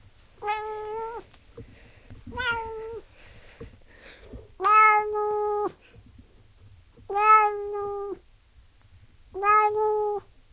whiney meow 2
my cat meowing
animal, cat, cats, feline, kitty, meow, meowing, pets